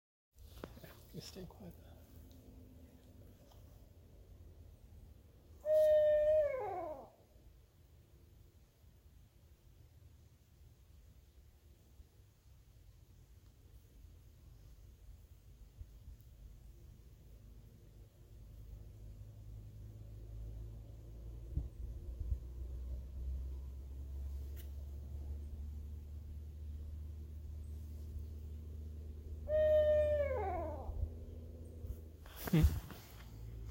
Forest recording of a large owl hooting high up in a tree in Vermont, USA